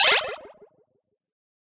An synthesized user interface sound effect to be used in sci-fi games, or similar futuristic sounding games. Useful for all kind of menus when having the cursor moving though, or clicking on, the different options.